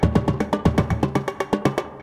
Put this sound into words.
kbeat 120bpm loop 1
A slightly ethnic sounding drum percussion loop at 120bpm.